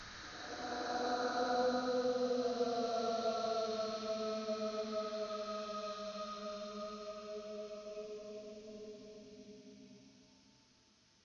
An eerie sound made by using "paulstretch" effect on "hello" in audacity. Made on an asus laptop